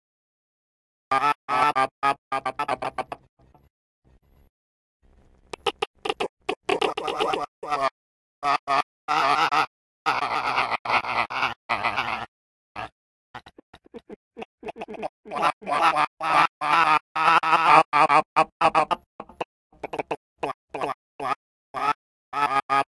Experimentation with programs that i "Rediscovered". I didn't think these "New" programs were worthy of using, but to my surprise, they are actually extremely interesting to work with!
These are really some bizarre effects that were produced with the new programs.
///////////// Enjoy!
Unusual, Strange, Outer-Space, Sci-fi, Weird, Vocal, Crazy, Nonsense, Paranormal, Alien-Species, Alien